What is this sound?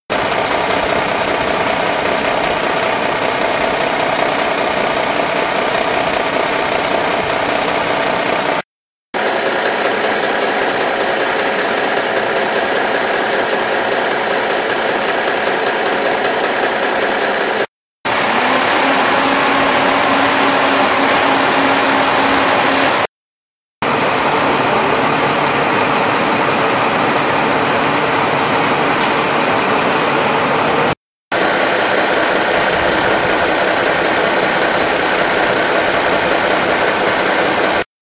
Truck Engine Idle Loops
Idle construction site vehicle sound loops.